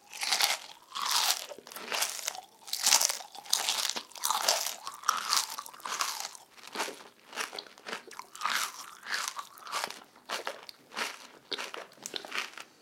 noise of corn-flakes being chewed, open mouth, mono Sennheiser ME66 > Shure fp24 > iRiver H120 (rockbox)/ masticando cereales con la boca abierta.
corn-flakes, environmental-sounds-research